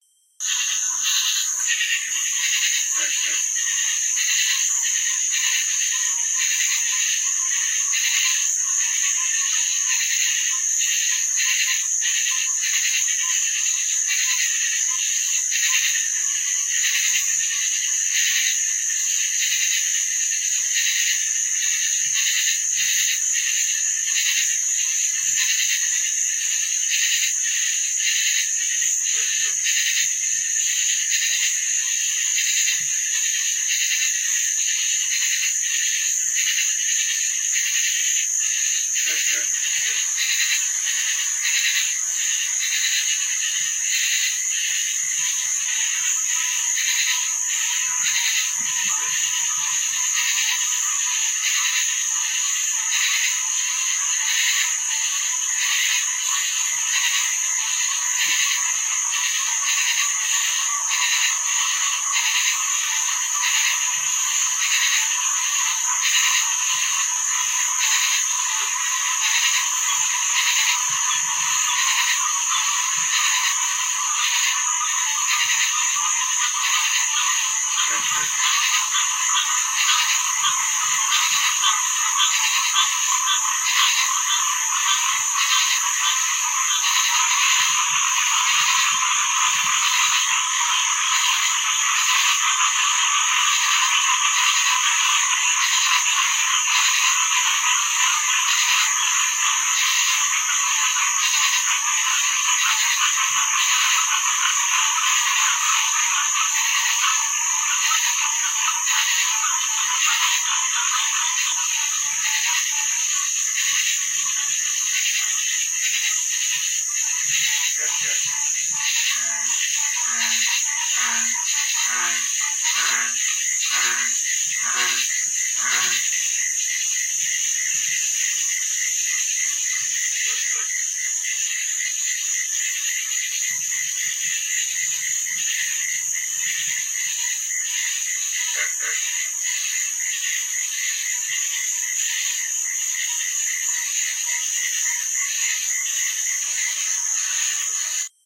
Ft Caroline near Spanish Pond 03
Swamp Crickets Atmosphere